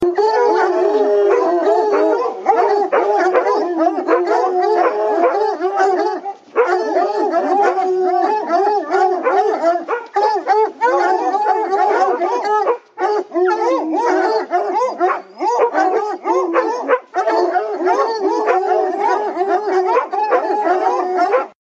pack of hunting hounds belling while waiting to begin hunting
recording date : 08/10/2017
recording device: Panasonic Lumix TZ20 camera
processed with Audacity
belling, dogs, hunting, pack